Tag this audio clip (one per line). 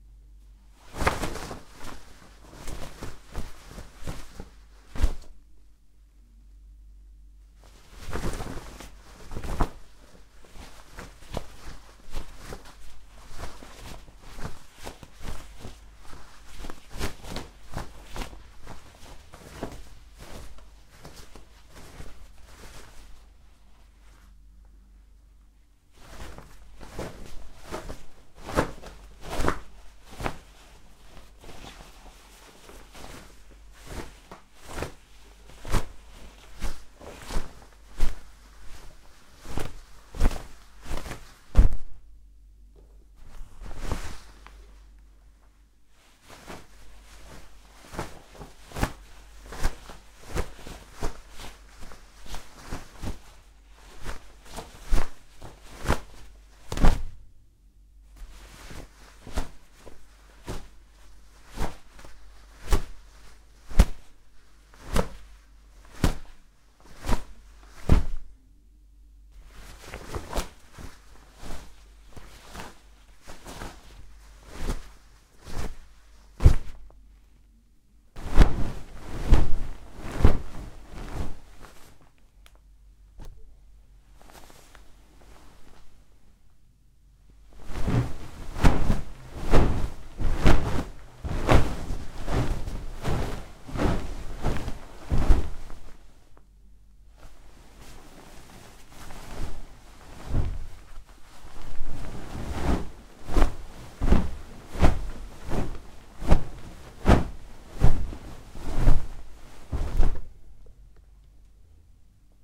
bed
covers
flap
flapping
usi-pro